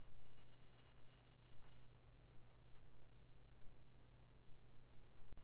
fan sound- from my external hard drive
The cooling fan on my external hard drive.